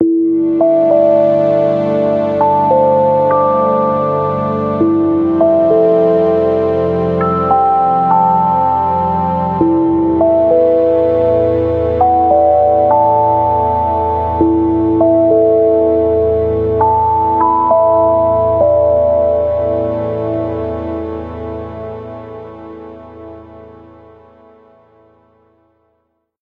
A short calm electronic melody suitable as a simple intro\outro soundtrack. The original version can be found in the "night across the stars (2 versions)" pack.